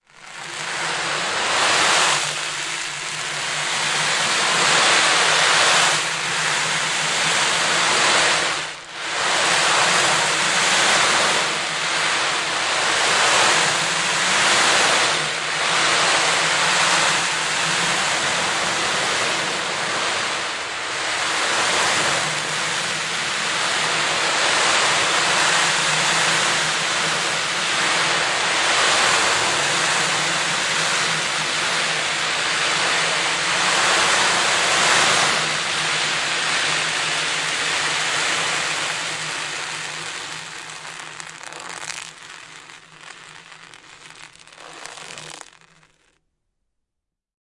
Ocean Drum, Rolling, Felt, A
Raw audio created by swirling an ocean drum with the felt side faced downward, creating a slightly softer ocean sound than if the plastic side were faced down.
An example of how you might credit is by putting this in the description/credits:
The sound was recorded using a "Zoom H6 (XY) recorder" on 12th June 2018.
drum, felt, ocean, percussion, roll, rolling